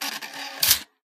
This is the sound of a cd rom opening. Recorded with an iPhone SE and edited with GoldWave.